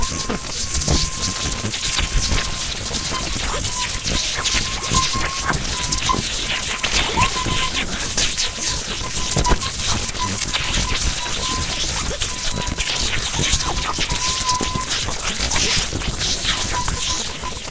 Low Electricity crackling
Another electricity crackling sound. Inspired again from Naruto anime and the sound of chidori.
Made by mouth :D
lightning, chidori, electrical, electricity, crackling, raikiri